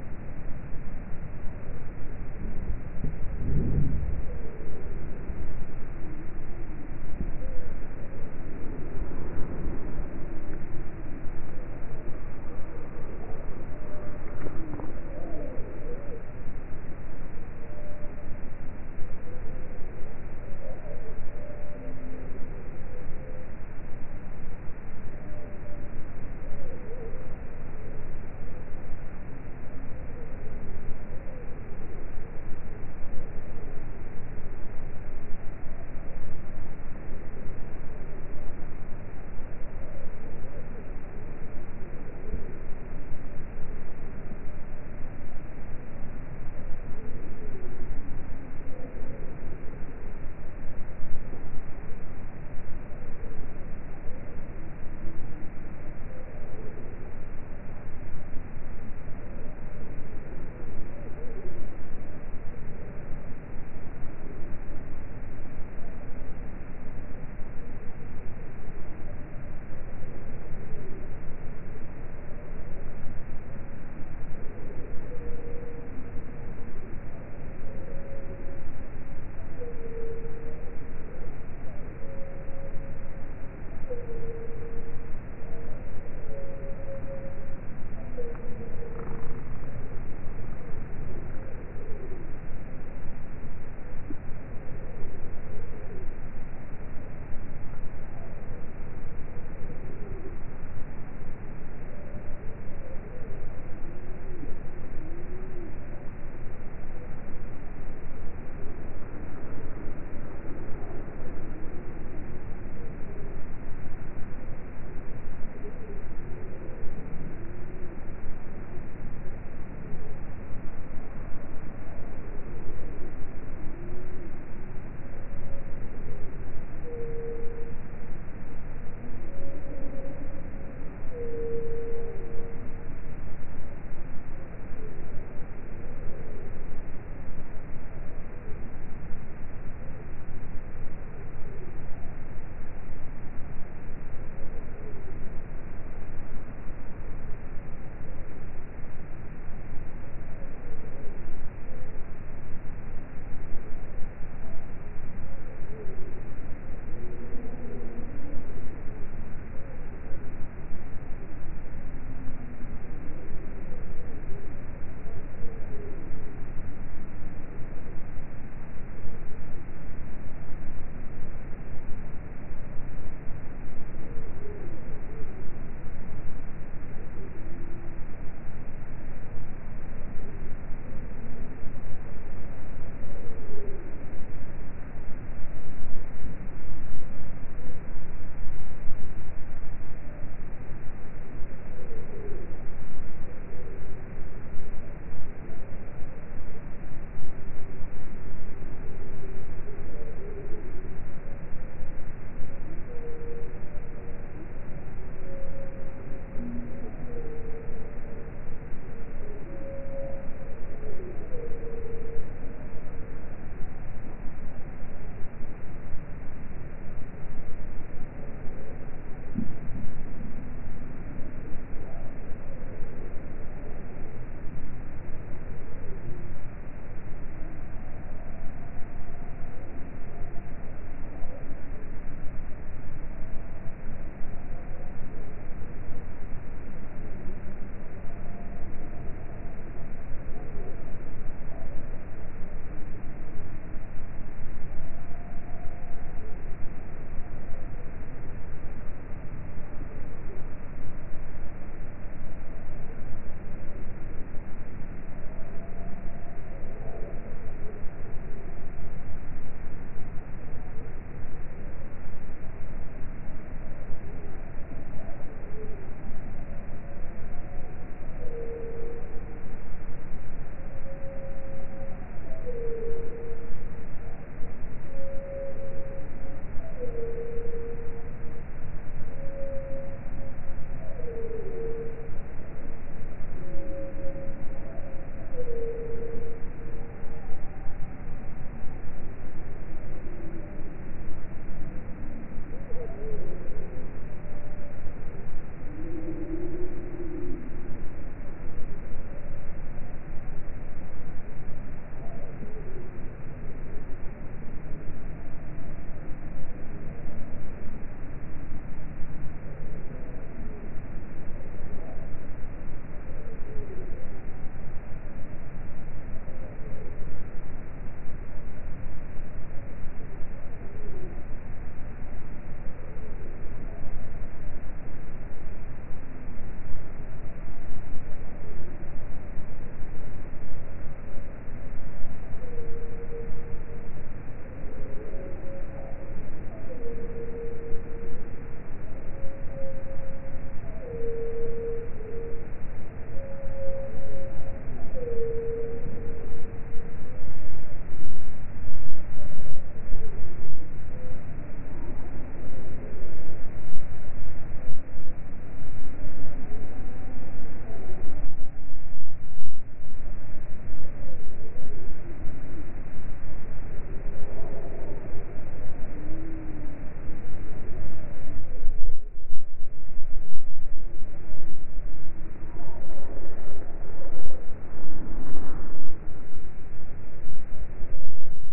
2019-05-20 birds ambiance quiet 3o
3 octaves down